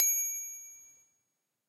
ding30603-spedup
That's really all I did.
It took all of 2 minutes.
thanks for listening to this sound, number 66136
ding, eye, high, shine, small, sparkle, tiny, twinkle